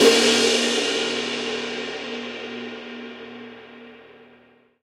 09 Crash Loud Cymbals & Snares
7A, Brahner, cymbal